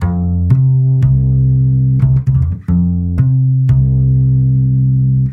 jazz, music, jazzy